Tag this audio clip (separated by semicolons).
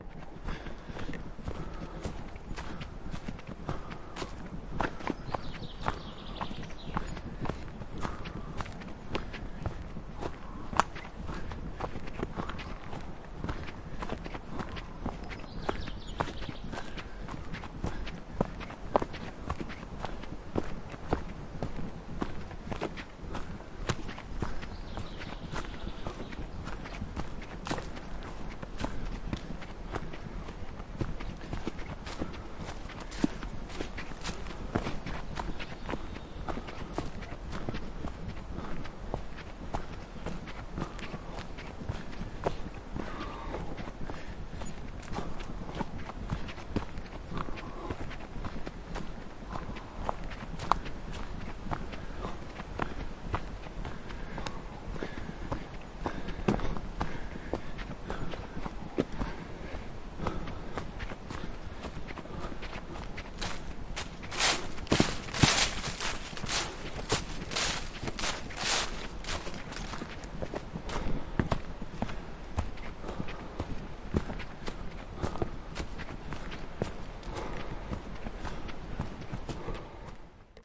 Fieldrecording; Running